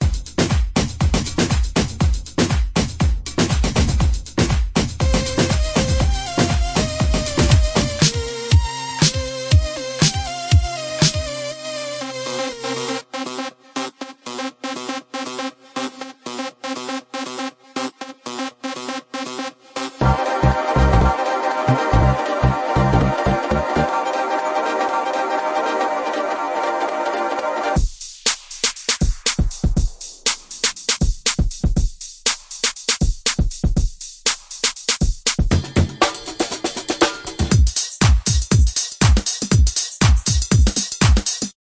cool music by me 8
made by me in garageband! :D
free, music, song